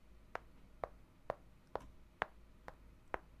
Counter Moving
Counter, Boardgame